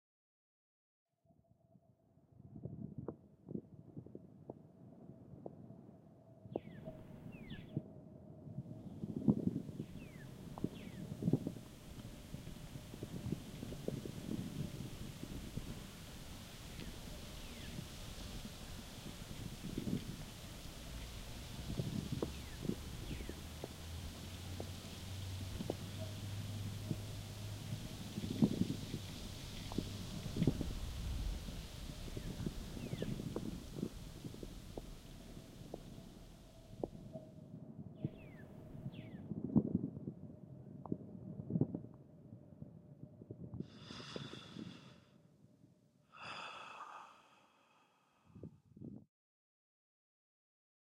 Peaceful Mountain Noises
This short sound clip consists of sounds of calm winds, birds chirping, and trees blowing in the wind ending in a calming exhale made y a young man. The sound of the whistling wind was recorded by softly blowing and whistling into the mic. The sound of the birds chirping was the sound of a cross walk signal beep, however its pitch was manipulated. All other recordings were actual recordings of trees blowing in the wind and a young man exhaling.